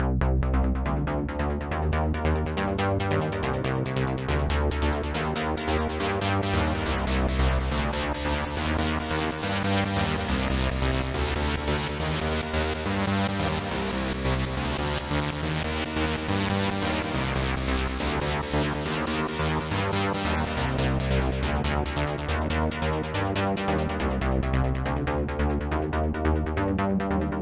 Simple melody with growing distortion and loops to original distortion.